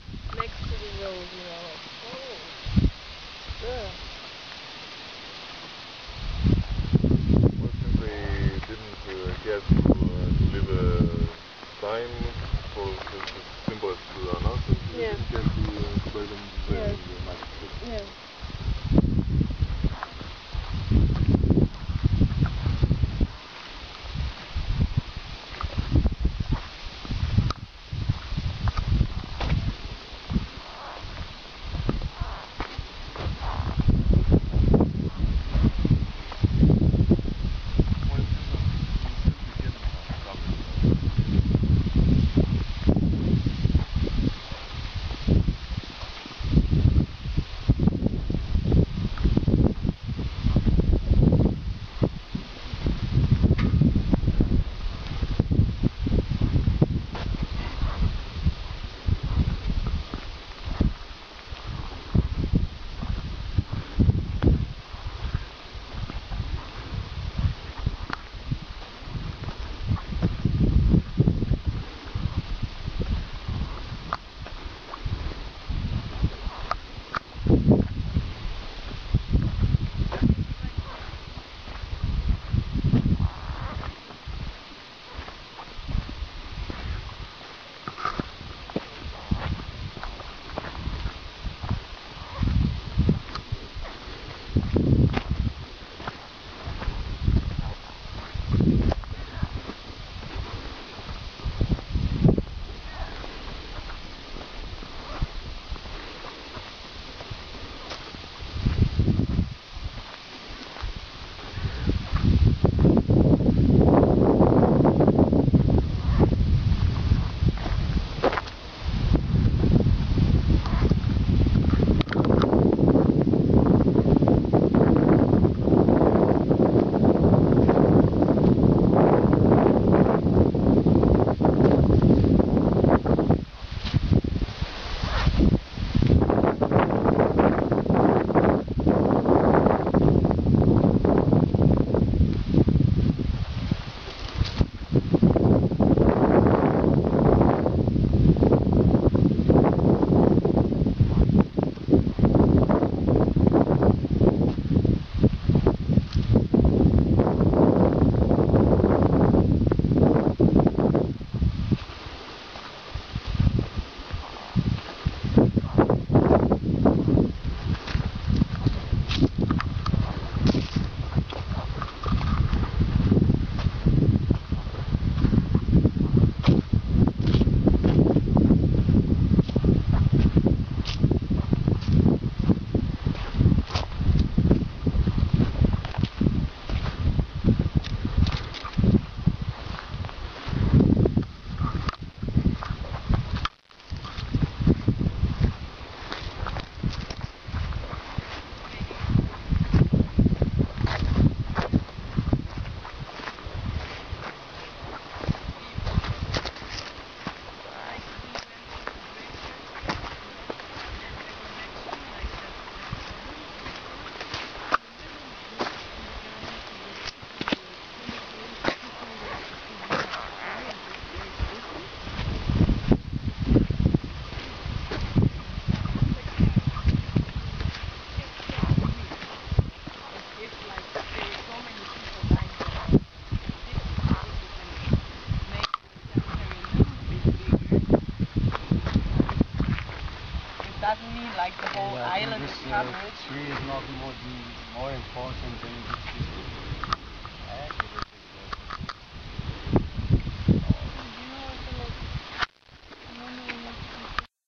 Fosa común de inmigrantes ahogados en el río Evros, en la frontera greco-turca
Mass grave of immigrants drowned in the river Evros, on the border between Greece and Turkey